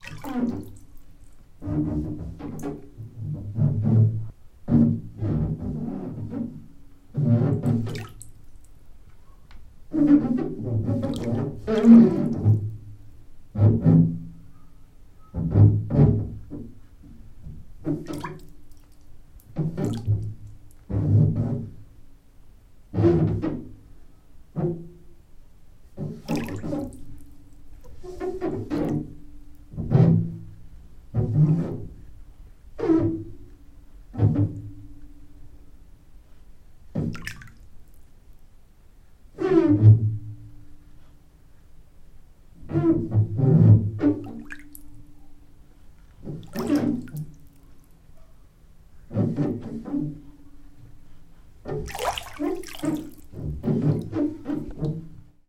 Wasser - Badewanne halbvoll, Bewegung
Half-filled bathtub with movement sounds
field-recording, bathtub, movement